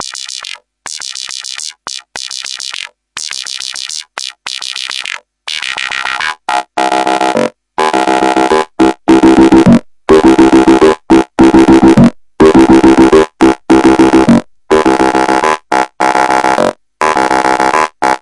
Some selfmade synth acid loops from the AN1-X Synthesizer of Yamaha. I used FM synthese for the creation of the loops.
acid, an1-x, sequence, synthesizer, yamaha